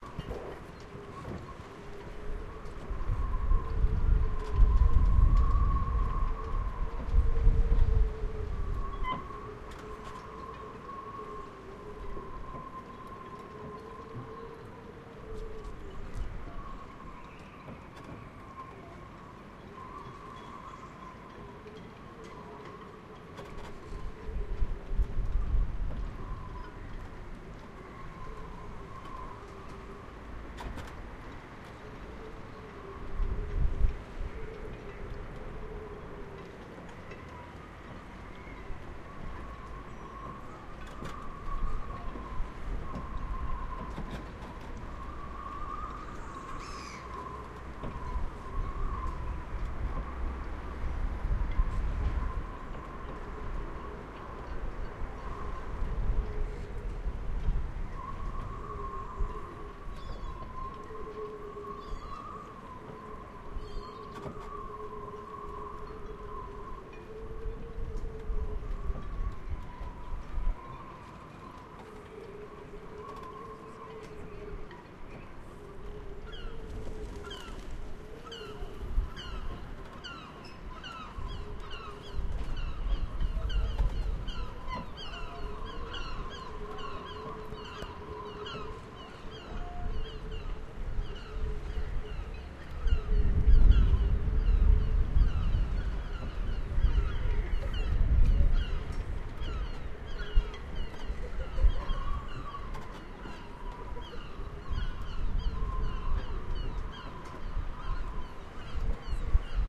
20071225-ramsgate-harbour
Christmas Day 2007 in Ramsgate Harbour. The weather was cold and misty with a bit of wind. The result is an eerie sound as the wind rattles the rigging of the yachts, enhanced by a couple of birds flying across the harbour making mournful squawks.
birds, boats, harbour, ramsgate